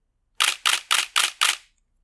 Nikon D800 sequence 5x
DSLR Nikon D800 shooting 5 times in 1/125 shutter speed
camera, dslr, Nikon, photo, photography, shutter